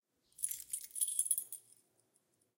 Keys Handling 1
The enjoyable and satisfying clinking symphony of handling keys on a ring
scrape, soundeffects, jingling, foley, sfx, keyfumble, drop, clink, keylock, key